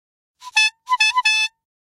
Bicycle air horn 001 (2)
Air Horn of a bicycle.
approach, bicycle, bike, cycling, pedaling, ride, wheel